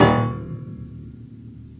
samples in this pack are "percussion"-hits i recorded in a free session, recorded with the built-in mic of the powerbook
unprocessed, piano, hit, string